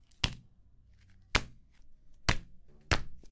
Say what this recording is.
Sound of four hits against my own hand, can be used for any kind of hit.
hit, hits, impact, smack